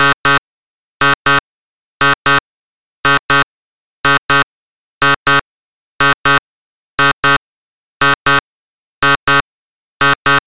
The curve has been draved in Audacity and edited